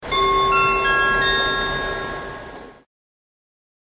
Attention Chime
the famous chime that plays before Phil says his pre-recorded speeches
chime, station, tannoy, train